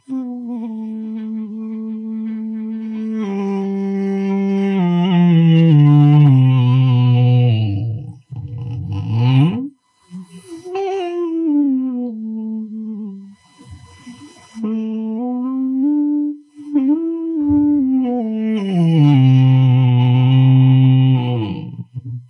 Weird animal zombie creature weird weak moaning
moaning, creature